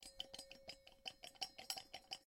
The sound of a hanging metal being swayed in the wind.
Lantern, Metal, Wind